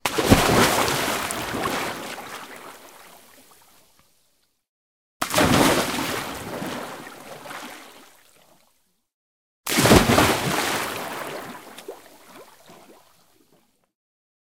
Foley Natural Water Jump Mono
Jump into water (x3)
Gears: Tascam DR-05
beach, body, field-recording, human, jump, jumping, pool, river, sea, splash, splashing, swim, swimming, swimming-pool, water